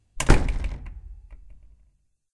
closing door
a big wooden door slamming shut, barn door